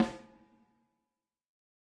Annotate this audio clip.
KBSD2 ATM250 VELOCITY3
This sample pack contains 63 stereo samples of a Ludwig Accent Combo 14x6 snare drum played by drummer Kent Breckner and recorded with a choice of seven different microphones in nine velocity layers plus a subtle spacious reverb to add depth. The microphones used were a a Josephson e22s, a Josephson C42, an Electrovoice ND868, an Audix D6, a Beyer Dynamic M69, an Audio Technica ATM-250 and an Audio Technica Pro37R. Placement of mic varied according to sensitivity and polar pattern. Preamps used were NPNG and Millennia Media and all sources were recorded directly to Pro Tools through Frontier Design Group and Digidesign converters. Final editing and processing was carried out in Cool Edit Pro. This sample pack is intended for use with software such as Drumagog or Sound Replacer.
14x6, accent, audix, beyer, breckner, combo, drum, drums, dynamic, electrovoice, josephson, kent, layer, layers, ludwig, mic, microphone, microphones, mics, multi, reverb, sample, samples, snare, stereo, technica, velocity